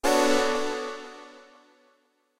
music game, dun 5
Music created in Garage Band for games. A dun-like sound, useful for star ranks (1, 2, 3, 4, 5!)
music, dun, music-game, game-music, score, game